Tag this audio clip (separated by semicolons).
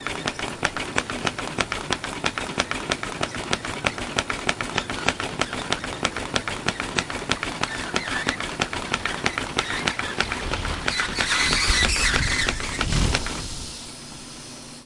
field-recording; folder; industrial; machine